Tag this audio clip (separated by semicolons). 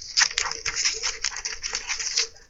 crafting; snip; scissors; scissor; snipping; cutting; paper; arts-and-crafts; cut; craft